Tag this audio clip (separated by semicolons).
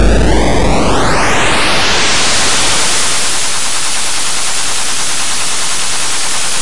8-bit; arcade; chip; chippy; chiptune; lo-fi; noise; retro; vgm; video-game